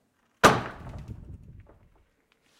Wooden Door Slamming Open
wooden door, slam against wall, loud, kick